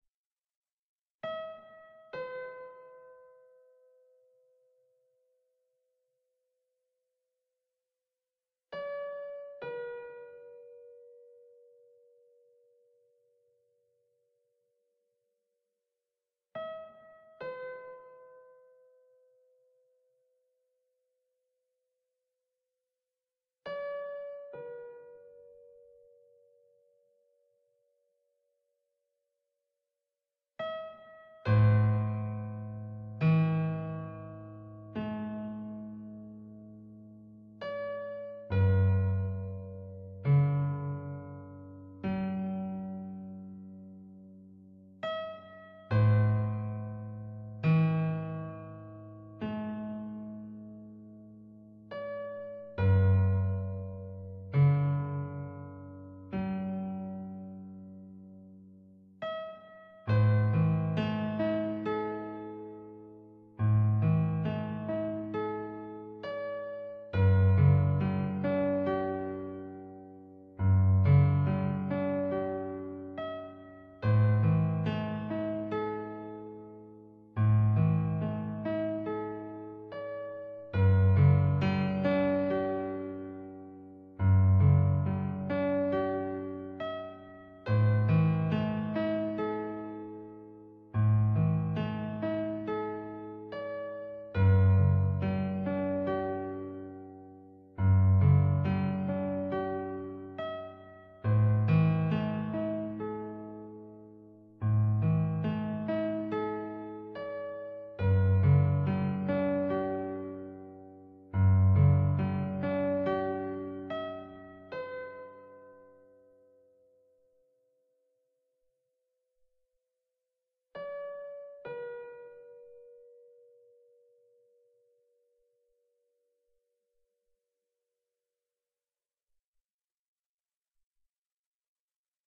melanchonic piano
A small piece of piano music for various purposes. created by using a synthesizer and Recorded with MagiX studio. Edited with audacity.
background, piano, melanchonic